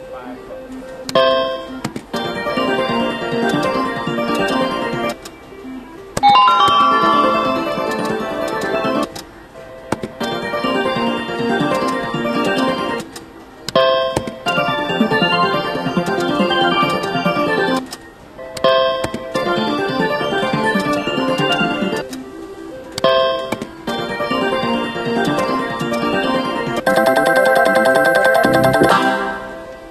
WOF slots1
Slot machine noises, Wheel of fortune bell, winner